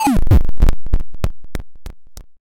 low pulse fade
SFX, sample, 8, game, bit